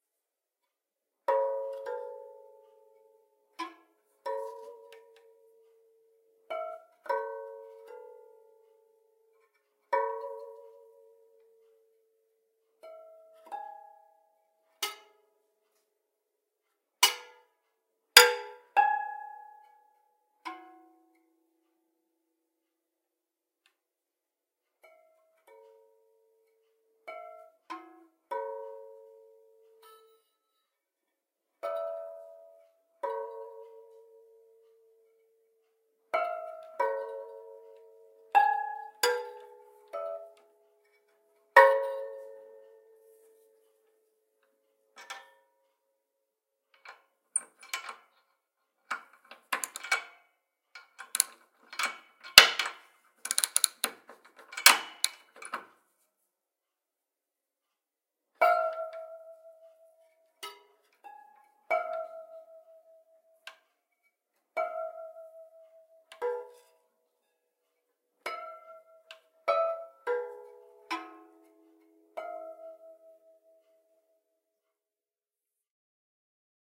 Piano strings 2
These sounds are from new strings not yet at nominal tension, I move the tuning key plucking. Plus some noise coming from the key.